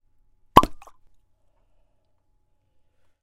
Wine Cork Plopp
opening a wine bottle with a nice cork-plop
plopp, food, bottle, open, wine, cork